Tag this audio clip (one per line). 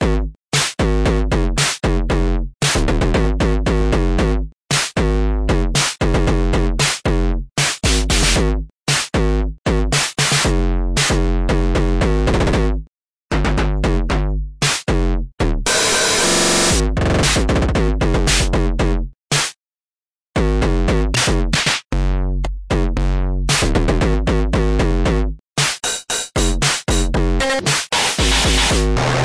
break
breakcore
gabber